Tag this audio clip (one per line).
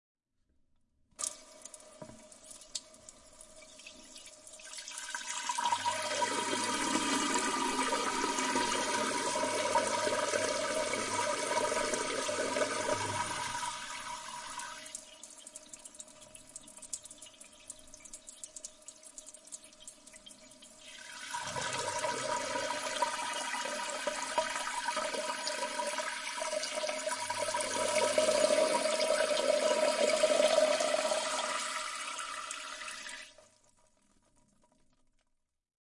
water
toilet
bathroom
spray